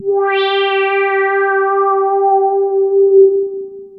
Multisamples created with subsynth. Eerie horror film sound in middle and higher registers.
evil, horror, multisample, subtractive, synthesis